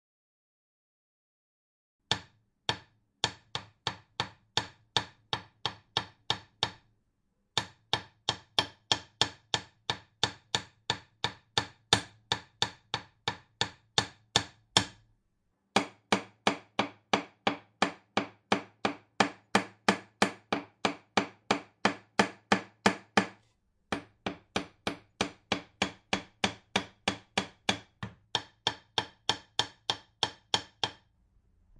Hammering the nail
Hammer, Panska, Work, Czech, CZ